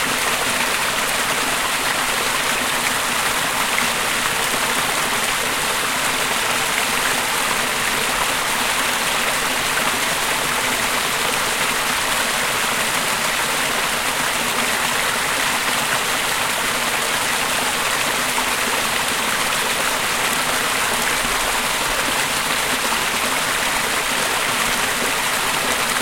water running pipe loop
Seamless ambience loop of water running out of a drainage pipe, recorded approx. 2m away from pipe exit.
Recorder used: ZoomH4n Pro, internal mics at 90° angle
mill, lapping, flow, liquid, flowing, babbling, stream, loop, gurgle, pipe